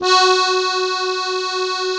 real accordeon sound sample
accordeon, keys, romantic